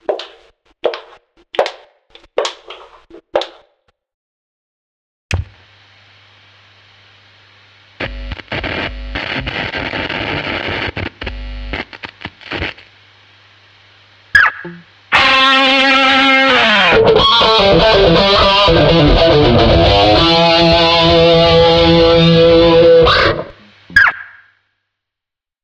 A little scene. Listen! Done in Reason. Starts with some of the footsteps of Streety´s sister.